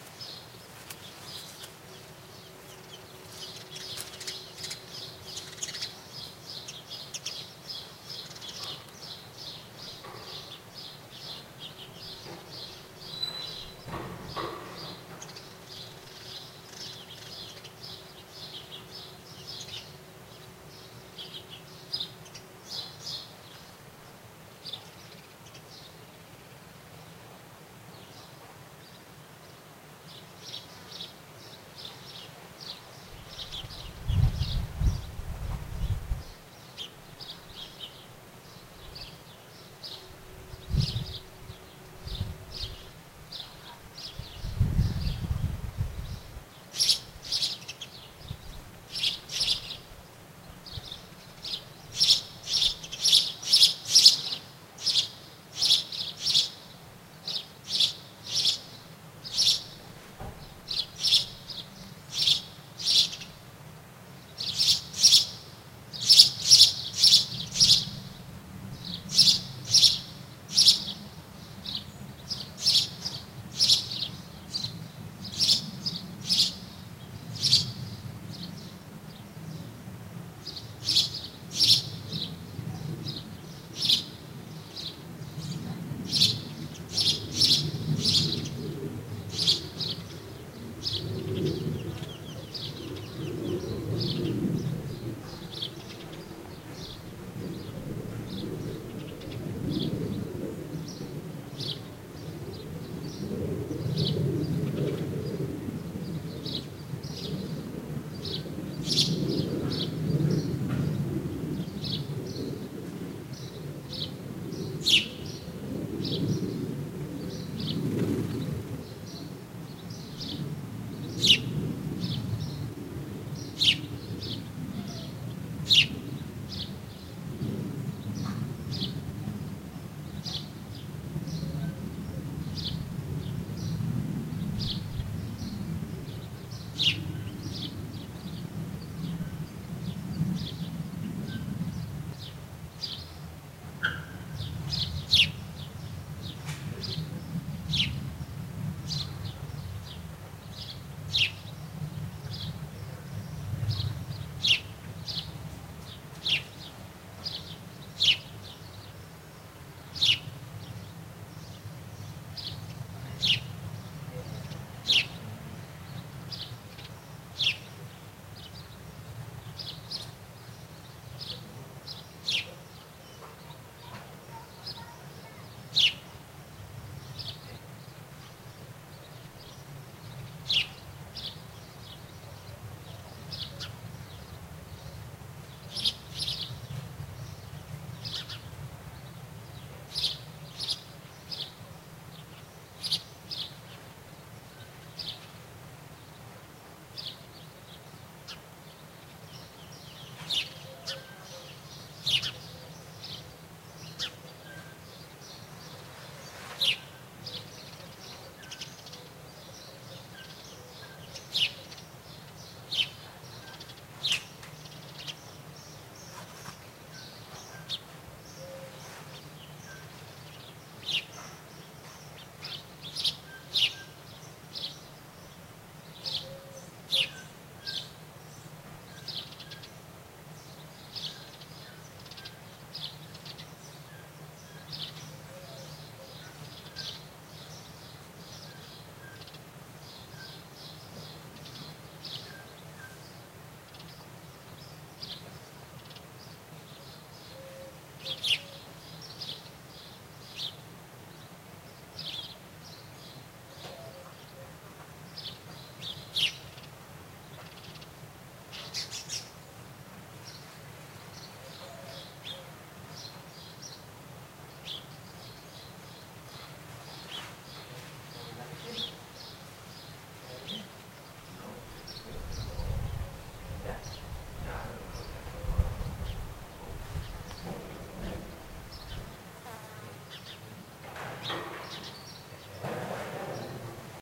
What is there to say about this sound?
Day ambient in countryside in Mediterranean Spain,
Sound hunter from Valencia, Spain
ambiance, ambience, ambient, birds, chicharras, countryside, crickets, field-recording, fire-crackers, mediterranean, nature, pines, summer, trees, wind, windy